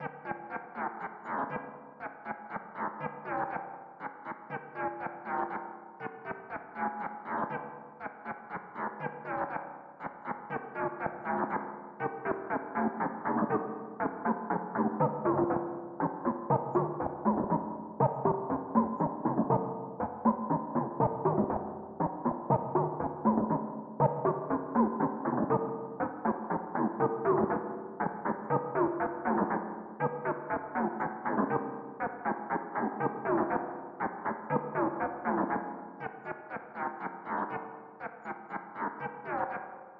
A strange rhythmic sound. I have not saved any presets or made any records. I do not remember how the sound was created. I think it was most likely made in Ableton Live.
This pack contains various similar sounds created during the same session.
strange, echo, delay, synthesized, synthetic, rhythmic